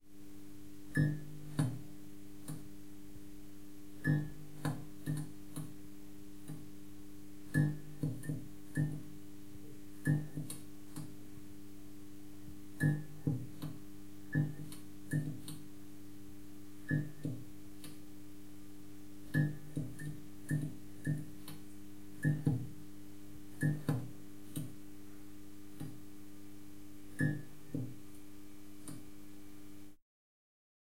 Occasionally flickering neon light in a small bathroom. Close-miked with a Zoom H4n.